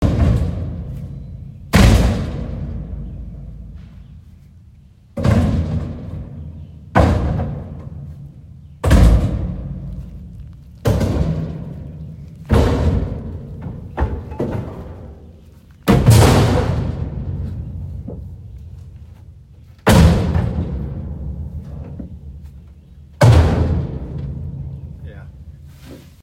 throwing stuff in dumpster sounds like gunshots
I tossed some stuff in an empty dumpster-- it echoed and sounded like gunshots